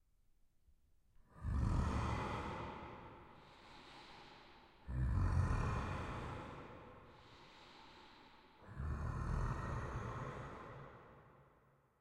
monster traveling
Monster moving left to right via panning, as if crossing in front of the listener.
Voice recorded with PreSonus M7 microphone on Ableton LIVE. Dropped pitch and added reverb for effects.